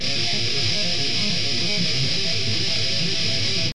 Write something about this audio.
groove loop 6
i think most of thease are 120 bpm not to sure
groove, guitar, hardcore, heavy, loops, metal, rock, rythem, rythum, thrash